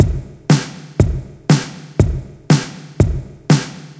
just a drum loop :) (created with FLstudio mobile)
drums, dubstep, loop, drum, synth, beat